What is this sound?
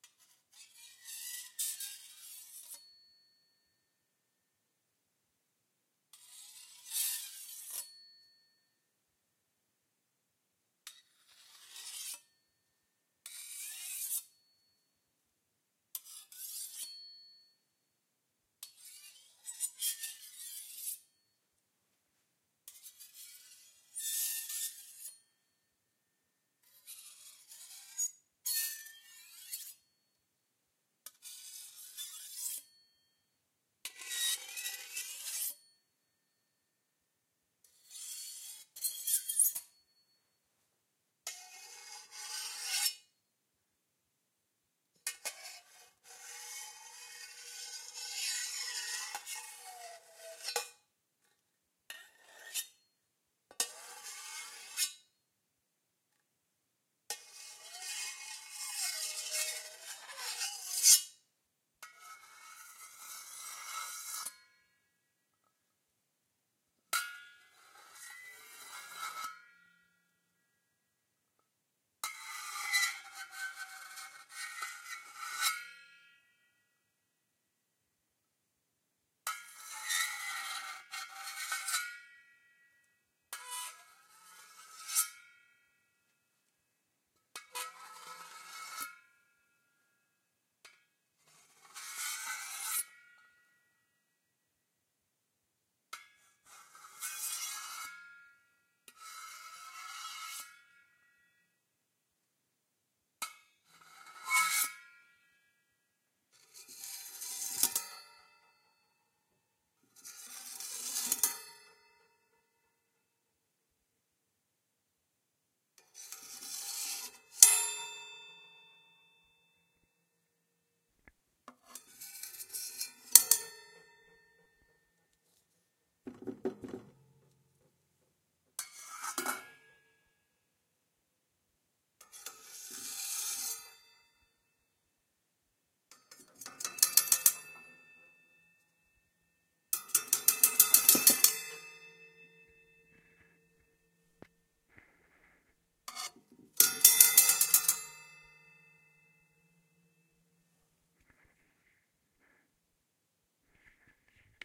knife-scrapes
A big kitchen knife and a metal spatula sliding along various other metal objects in the kitchen. Good for foley use, but could also serve as horror FX with some processing. Recorded with an Olympus LS-5.
noises, scrapes, knife, kitchen, metal